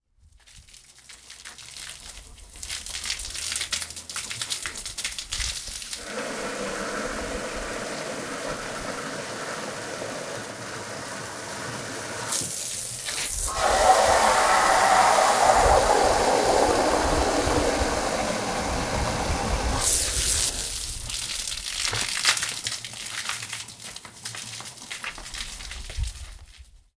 Hose Waters
Recorded some splashy sounds while someone is using a hose to wash his yard. Kapesovo, Greece, 2019.
hose, splash, splashing, water, waters